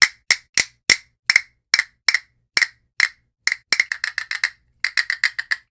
A few different wooden claps made using castanets.